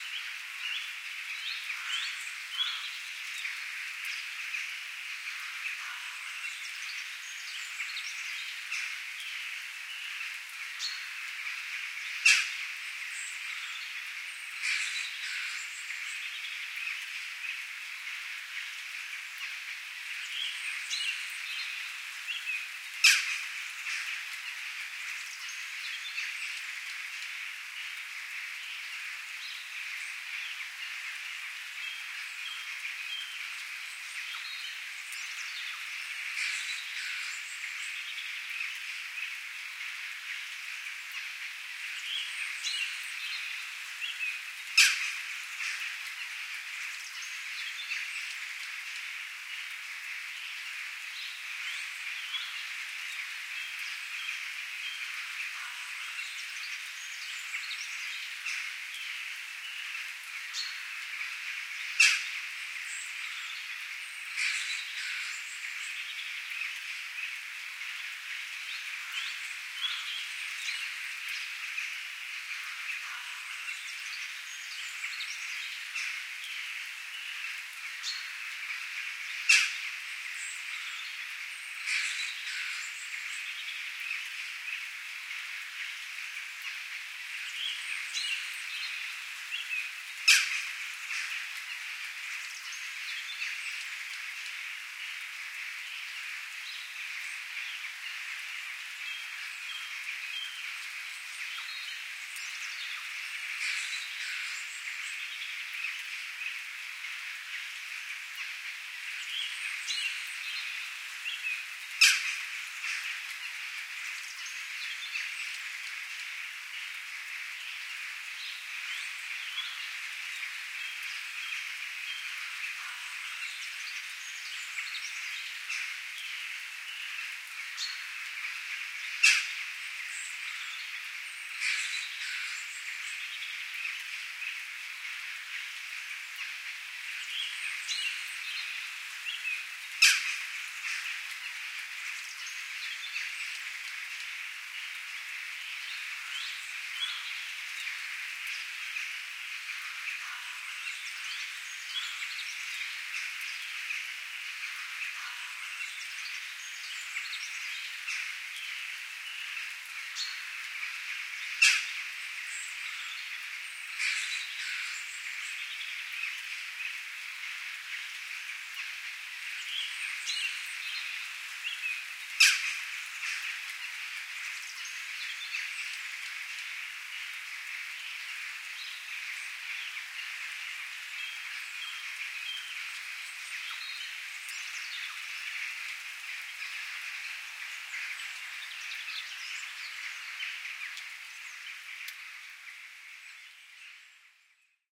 Birds in Rain Sounds (Scotland)
Birds In Rain. bird sounds in light rain in Scotland.
ambience, ambient, bird, birds, birdsong, field-recording, forest, morning, nature, rain, spring